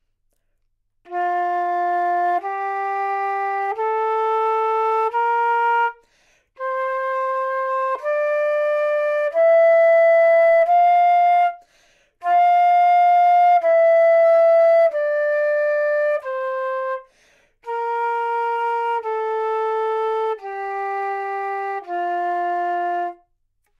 Flute - F major
Part of the Good-sounds dataset of monophonic instrumental sounds.
instrument::flute
note::F
good-sounds-id::6924
mode::major
Fmajor, flute, good-sounds, neumann-U87, scale